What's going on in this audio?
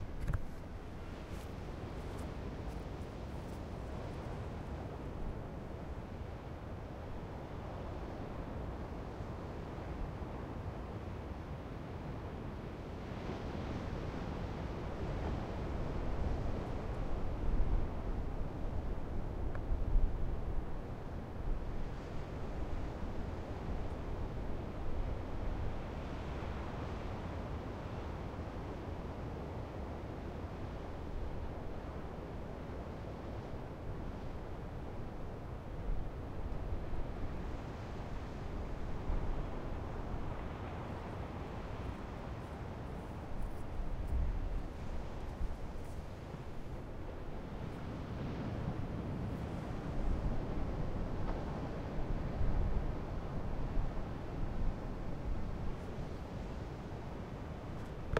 mussel-rock-waves-hires
ves crashing on cliffs at mussel rock in pacifica, california. this is a higher-resolution file
waves, pacific, cliff, ocean